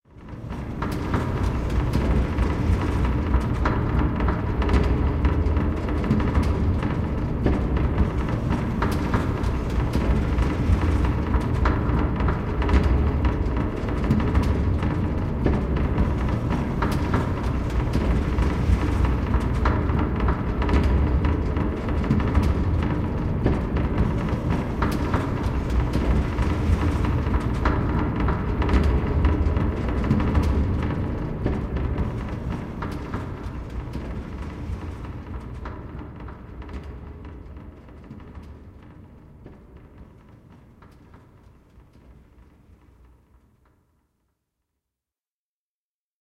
sound of a revolving stage recorded from under the stage
field-recording, stage, theatre